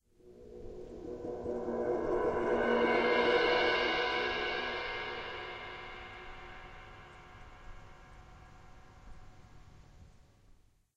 Suspended Cymbal Roll